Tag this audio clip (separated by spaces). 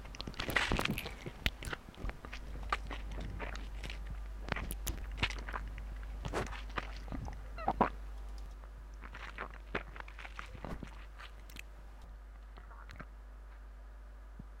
sphincter schlup